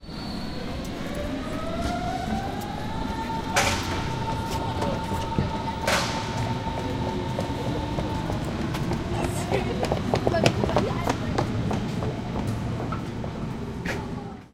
Two people running for a train in Berlin, Germany. One of them sounds to be a woman.
Recorded with Zoom H2. Edited with Audacity.